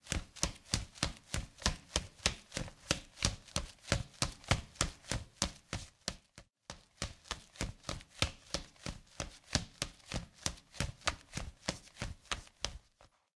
Footsteps running
Running barefoot on wood floor